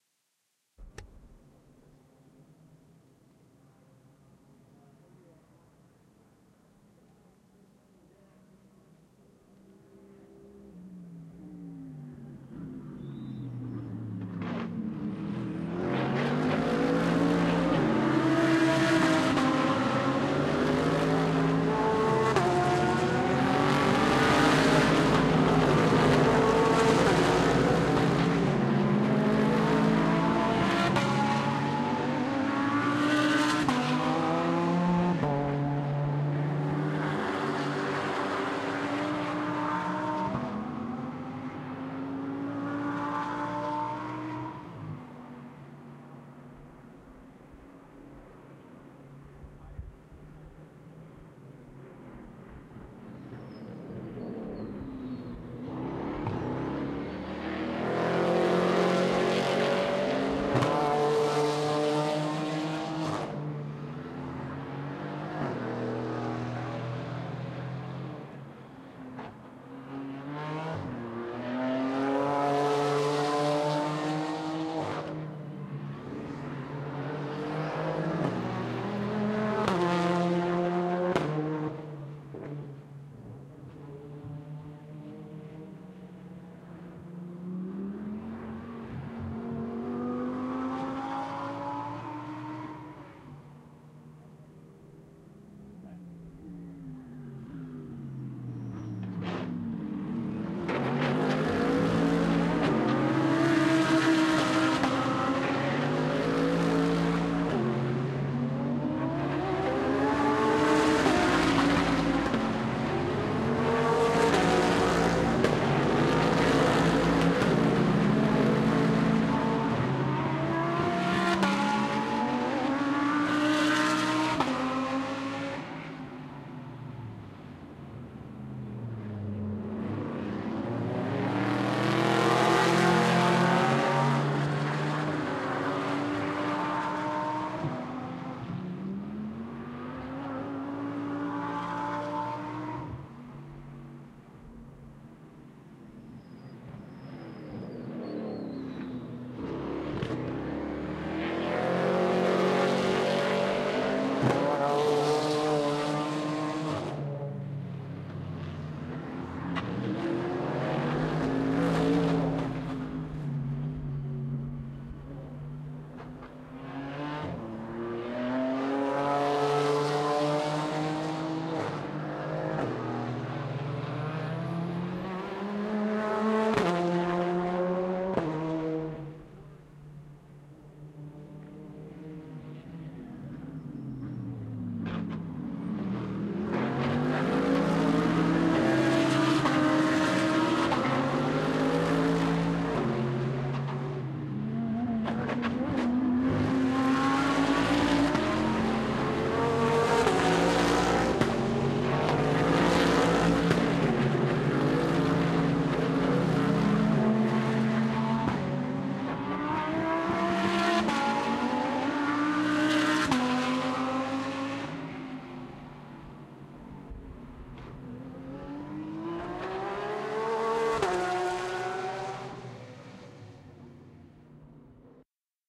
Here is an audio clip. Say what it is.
003[laguna.seca]102818
Laguna Seca GT Race, 3 minutes of audio from the Corkscrew.